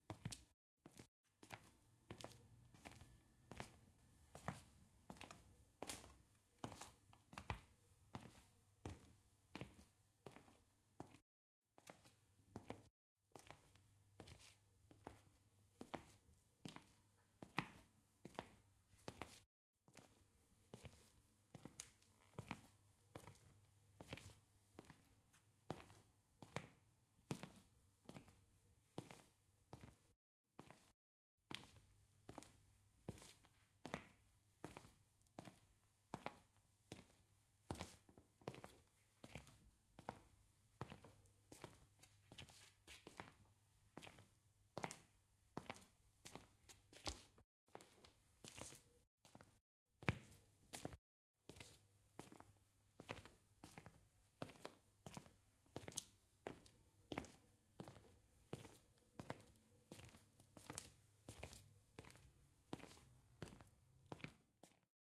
Footsteps, Indoor, Soft
Soft footsteps indoors sound effect.
From our new Sounds Of life Sample pack series.
Coming Soon to :
Footsteps, Indoors, Walking